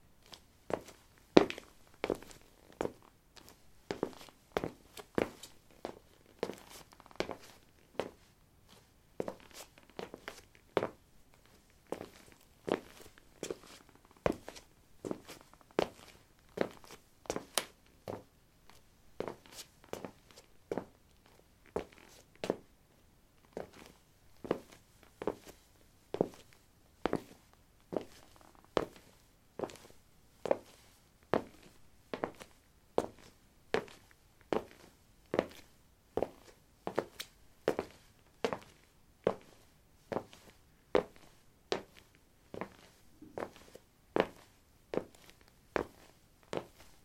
lino 12a squeakysportshoes walk
Walking on linoleum: squeaky sport shoes. Recorded with a ZOOM H2 in a basement of a house, normalized with Audacity.
walk, walking, step, footstep